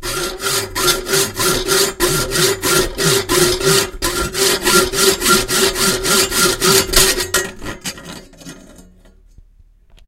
A hacksaw saws metal.